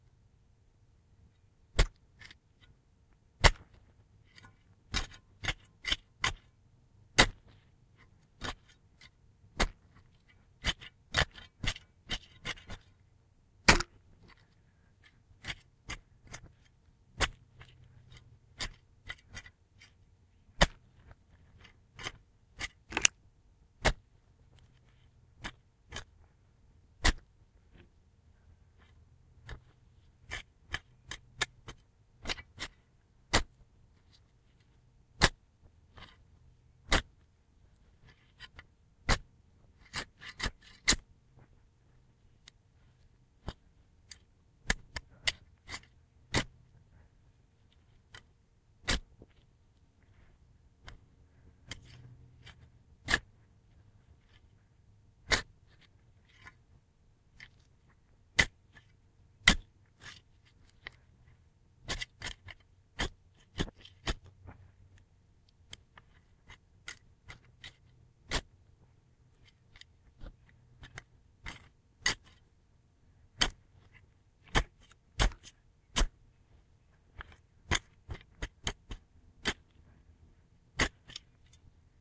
Digging with shovel
Digging with a shovel for about a minute.
clang, hit, shovel, swing